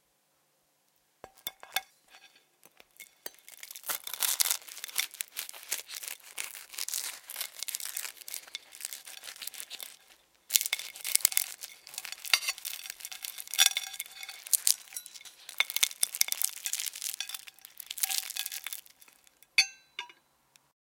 cutting croissant ST
crunchy sound of a knife cutting a croissant on a china plate
croissant, crunchy, h4n, zoom